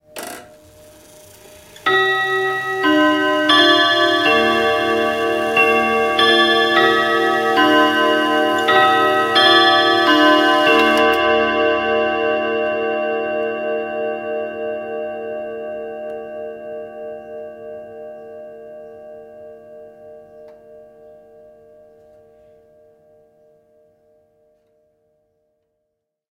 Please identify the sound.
3 gustav becker westminster three quarter
1920s Gustav Becker wall clock chiming the three-quarter hour.
Recorded with Rode NT2A microphone.
clock, gustav-becker, three-quarter, chiming, westminster-chimes